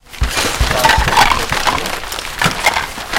Multi Layered Cashing Noise
26 Layers of sounds for a full and complex crashing sound.
clank, crashing, destruction, falling, hit, impact, metal, metallic, paper, percussion, scrape